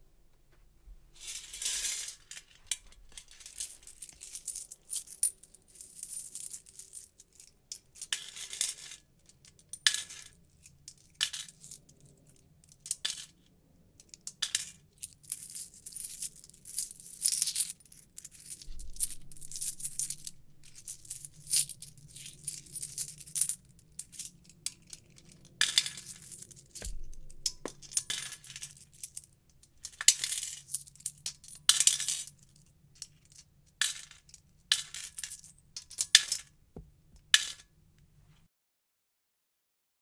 Coins in Bank
Putting several coins into a piggy bank. Hearing coins jingle
coins, coins-in-piggy-bank, placing